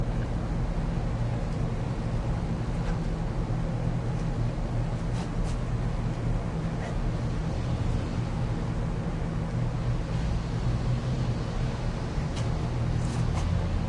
Sounds recorded while creating impulse responses with the DS-40.
field-recording, ambiance